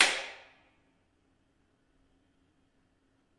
This is a concrete hallway/cellar in the building that i live in =)
It's a dynamic one!